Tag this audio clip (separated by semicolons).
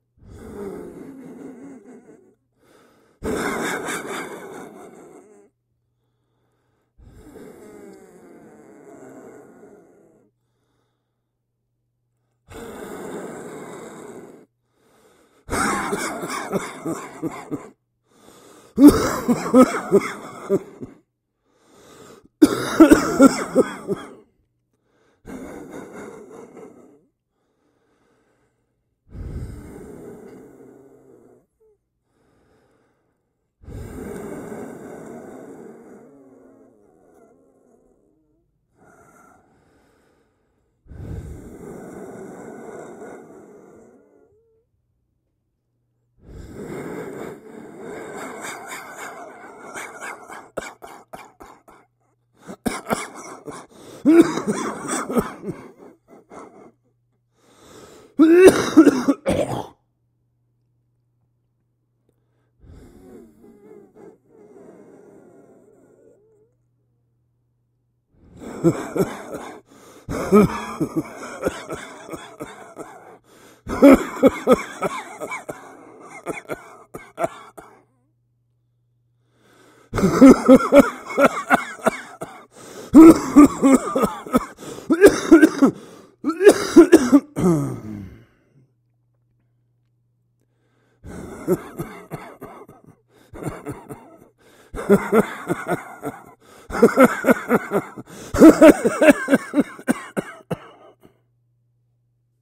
brawl; breath; cold; cough; flu; human; laugh; lights; male; rasp; sick; sneeze; throat